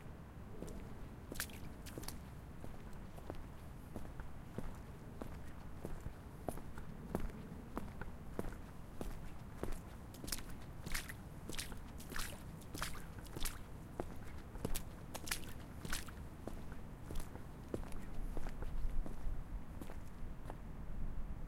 Walking in water puddle on the wharf of the Colombes - Le Stade railway station (France).
Recorded with a Zoom H4N, edited with Audacity under Ubuntu Debian Gnu Linux.